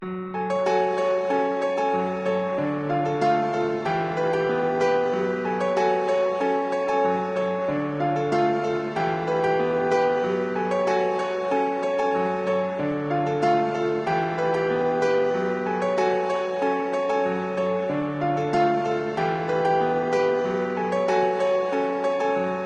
confused piano
A short sad and haunting piano phrase sequenced on a Korg Triton. Heavy reverb was placed on the piano.